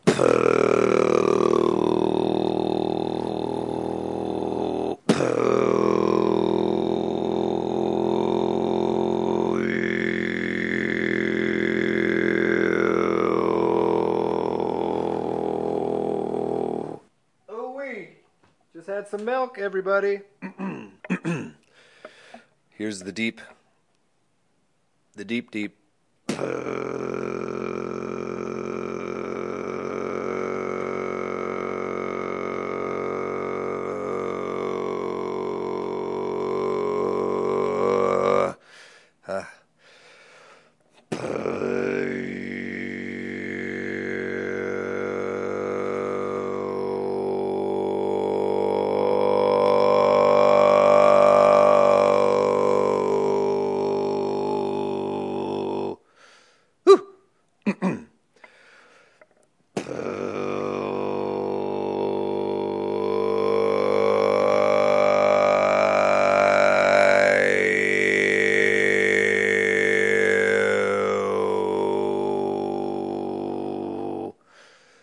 Tuvan-esque throat singing. It hurt. Hope you can use it! - all done with my vocals, no processing.
bass, beat, beatbox, beatboxing, chant, chanting, loop, looping, loops, monks, powerbass, throat, throatsinging, tibet, tribal, tribalchant, tuvan